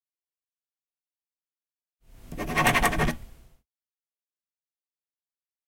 Writing a signature with ballpoint pen
CZ, Czech, Panska